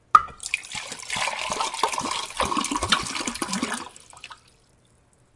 We filled a glass bottle with water from the kitchen sink, then quickly turned the bottle upside-down. The water made a popping sound as it chugged out, making splashing sounds.